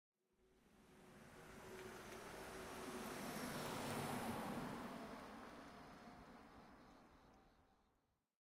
Japan Kashiwa Countryside Car Passing
Recorded in Kashiwa, in the Chiba prefecture of Japan (east of Tokyo). A short one. For more and longer sounds check the whole sample pack.
car, Japan, Kashiwa, passing, traffic